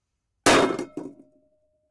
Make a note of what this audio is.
Pan Hit
hit, hits, pan